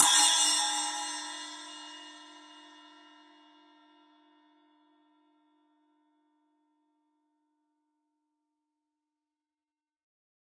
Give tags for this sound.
cymbal multisample velocity 1-shot

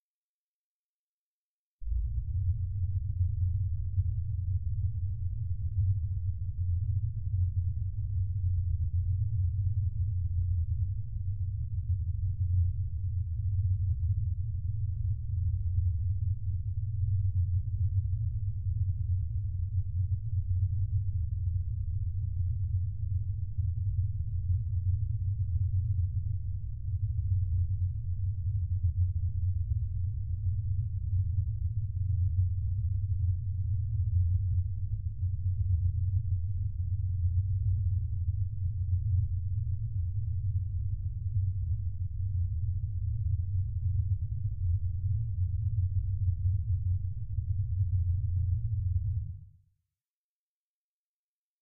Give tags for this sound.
soundscape emergency effect sound-design space noise dark deep spaceship future futuristic energy ambient starship hover Room fx drive pad background drone sci-fi engine electronic rumble ambience impulsion atmosphere machine bridge

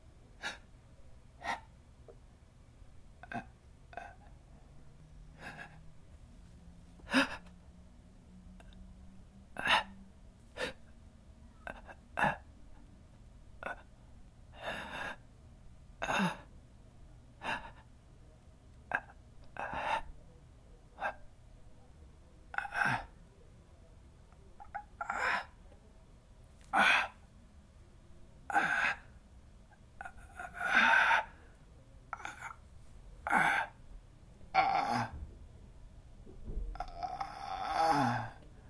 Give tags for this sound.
subtle-death vocal gasp creepy subtle quiet-death deep voice breath struggling-to-hold-on dying pain air m-dying sound speech sigh last-breath small-death-sound im-dying quite-gasp death quite man grasping male dead writhe grunt grasping-for-air

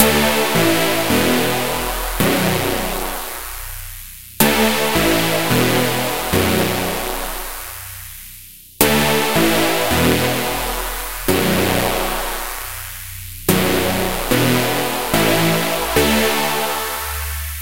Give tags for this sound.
electronic rave saw wave

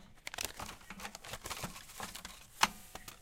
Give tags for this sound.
money bank cash

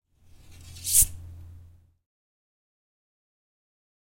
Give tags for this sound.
laser-sound knife Lasers laser space knife-sound pew-pew

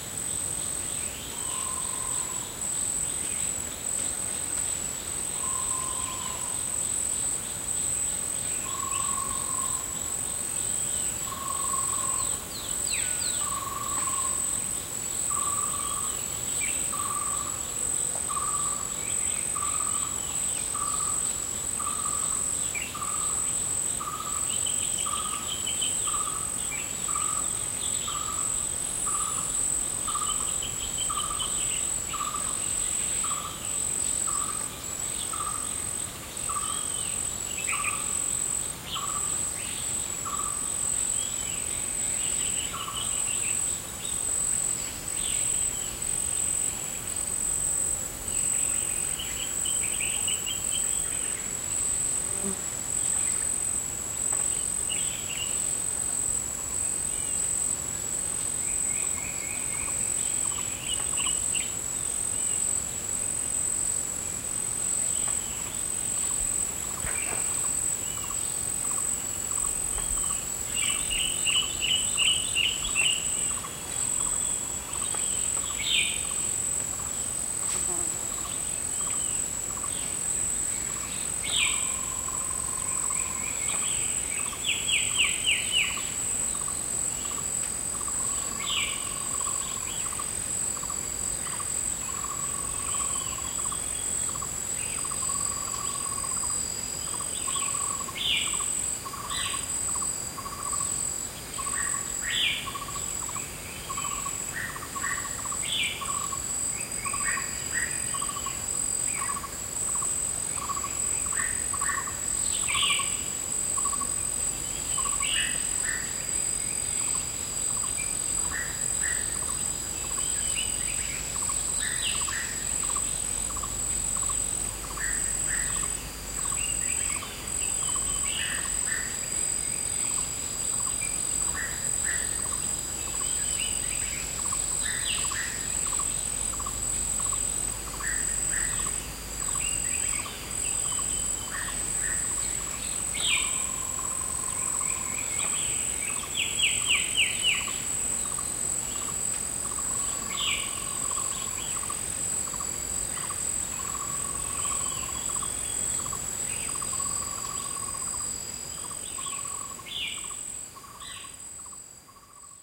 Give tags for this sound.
insects Asia Tropical South-East Mountain Birds countryside Thailand

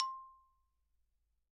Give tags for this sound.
kelon mallets

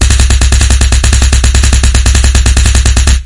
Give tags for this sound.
mma kanfu kick martial-arts punching kicking fight fighting hit beat boxing kung-fu punch

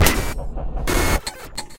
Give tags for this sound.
computer cyborg digital effect electro fx glitch hi-tech industrial lab mutant noise robot sci-fi soundeffect soundesign transformers

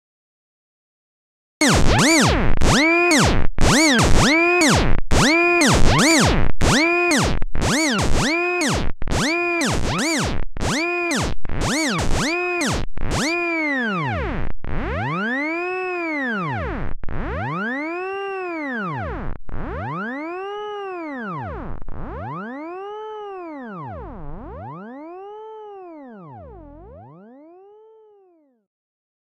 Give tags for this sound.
halt scratch screech mechanical mixer

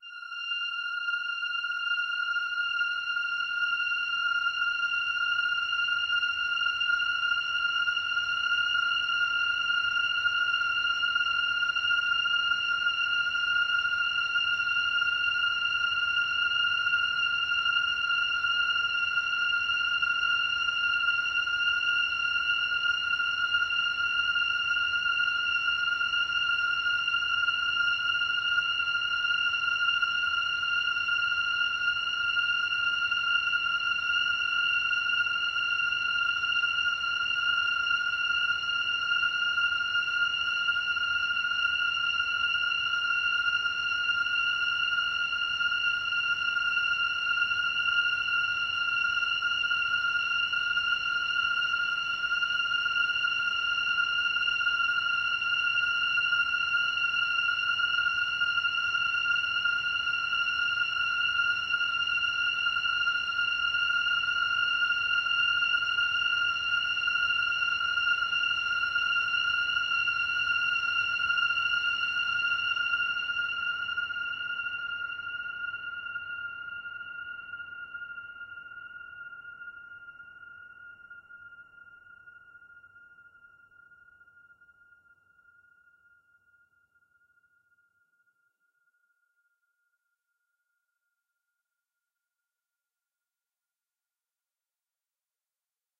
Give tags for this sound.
ambient drone

